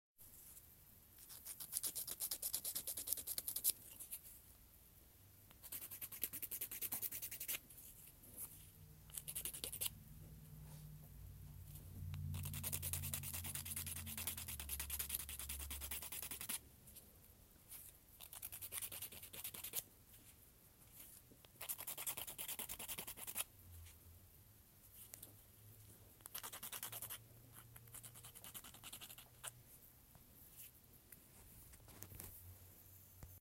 Filing Acrylic Nails
Me filing my acrylic nails with a heavy duty file.
Sounds kind of cool. No credit ever required.
Filing, scratching, rasp